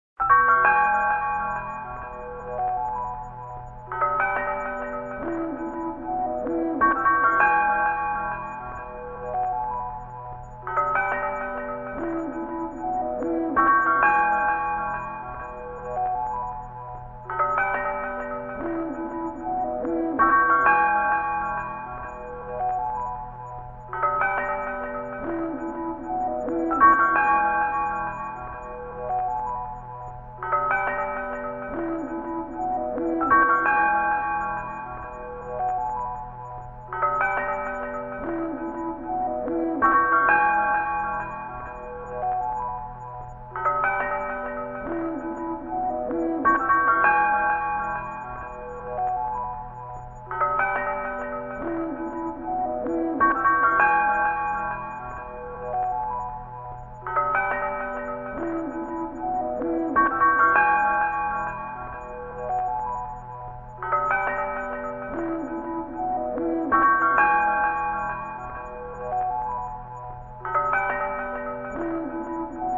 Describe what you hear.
When the Wolves Cry
This sound is from a collection of Sound FX I created called Sounds from the Strange. These sounds were created using various efx processors such as Vocoders, Automatic filtering, Reverb, Delay and more. They are very different, weird, obscure and unique. They can be used in a wide variety of visual settings. Great for Horror Scenes, Nature, and Science Documentaries.
aggressive, big, chaotic, disturbing, Efx, Sound, Soundtrack, Strange, Transformational, Unique, Universe